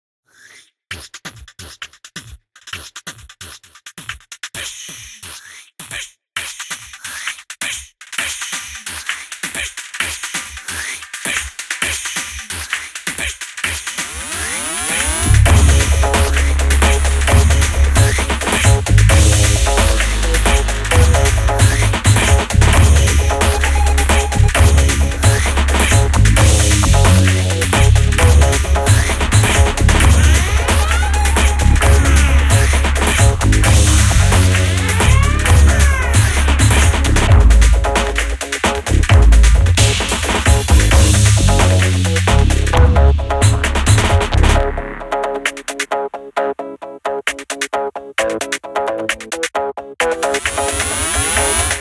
crunch beat 132 BPM M
Stereo beat with some Melody/bass. Made in my daw.
All for the pack, the beats 'n stuff pack!
kick, big, beat, bass, break, beatbox